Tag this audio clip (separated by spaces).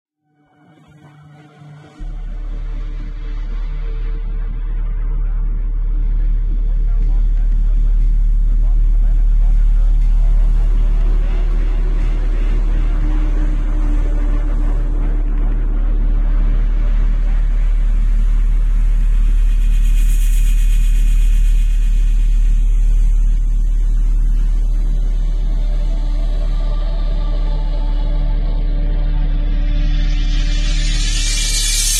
ambience eerie electronic intro processed scary soundscape